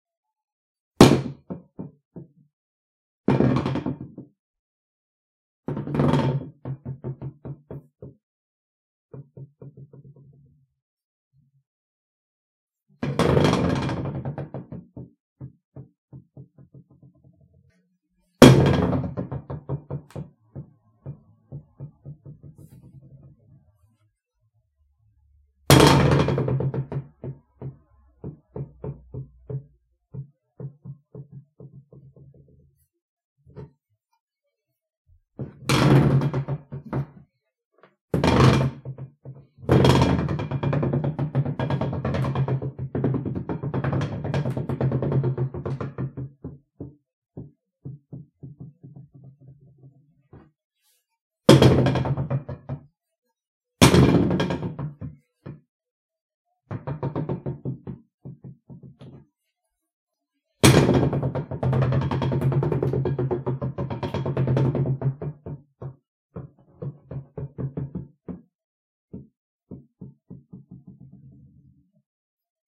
Bol lancé et qui roule sur bois
A bowl throw on a wood floor, and roll on the floor
Un bol lancé sur un parquet en bois qui y roule ensuite
Recorded with a ZOOM H2N - Cleaned
bol, lancer, choc, wood, roule, bowl, vaiselle, roll, sol, throw, bois, floor